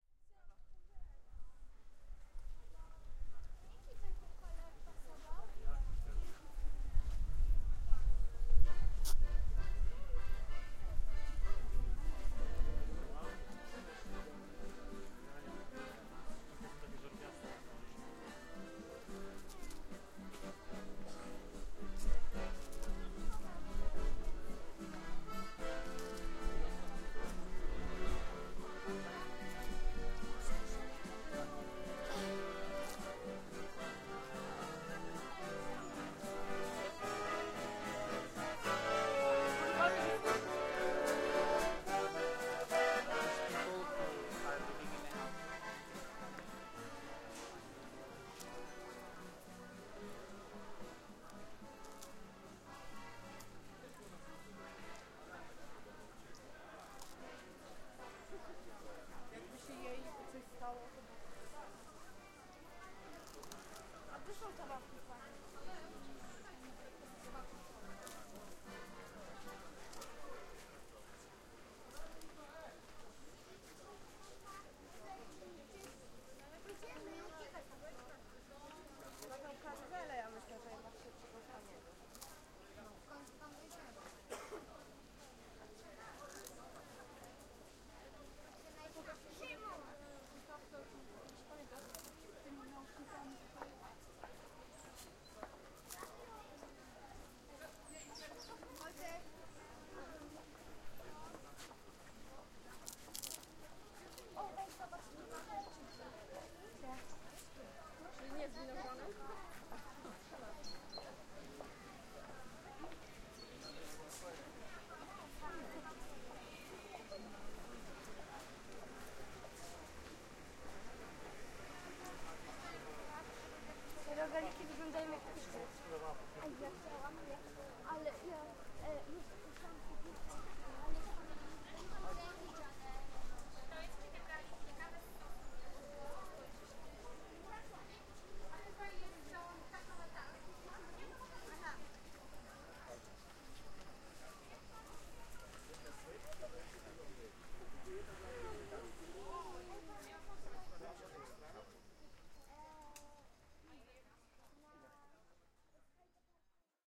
fair, stalls, city, holiday wine, walk, market, mall, Poland
fair, wine, walk, mall, stalls, city, market, holiday, Poland